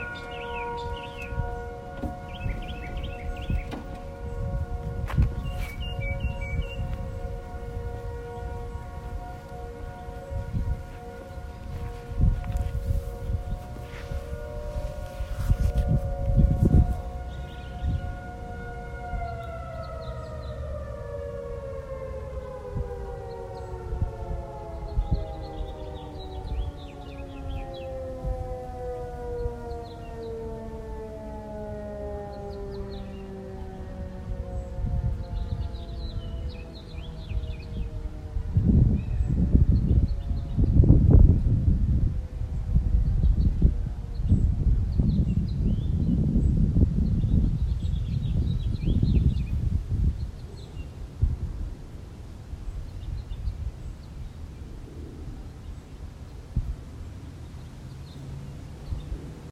Field recording of last bit of tornado warning test on University of Central Arkansas campus, Conway. Occurs every Wednesday at noon. Mono recording.